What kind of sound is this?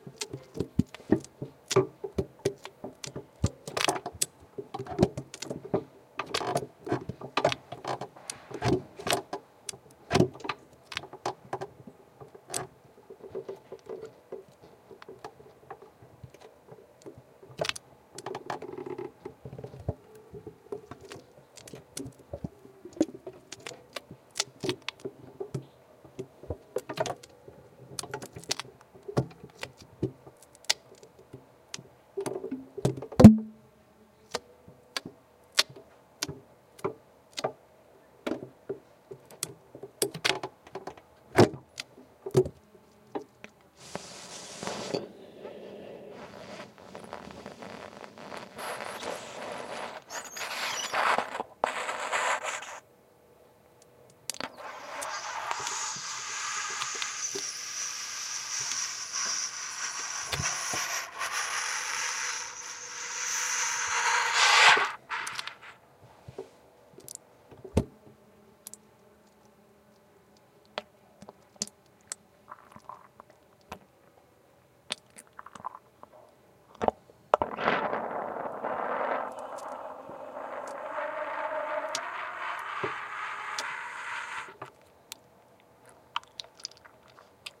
Lowering the microphone into a saxophone ( back at home ) plagazul
records an interesting mixture of breath and key noises. You can hear
the keys of the saxophone opening and closing. Because they are
slightly damp you can hear strange slurping noises.